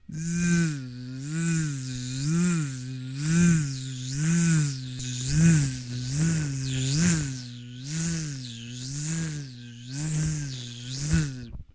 una plaga de mosquitos